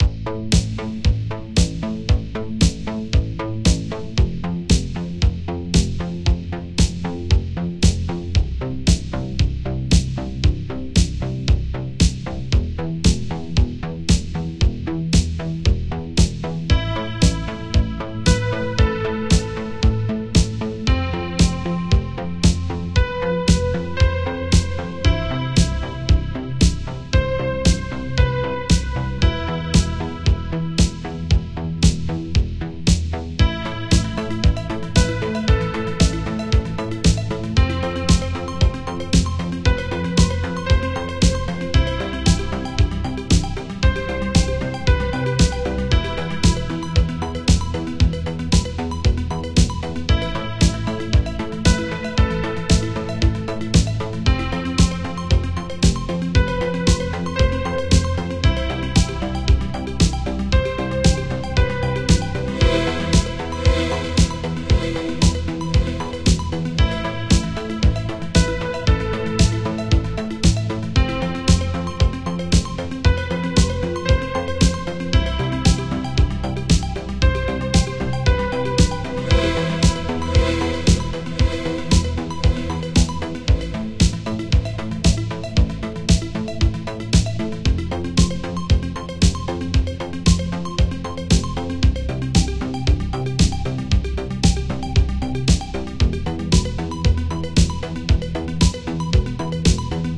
Tecno pop base and guitar.
Synths:Ableton live,Silenth1,Kontakt.
acoustic, base, chord, clean, electric, guitar, loop, metal, original, pop, quantized, rhythm, synth-bass, Tecno, track